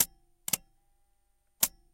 Queneau machine à coudre 30
son de machine à coudre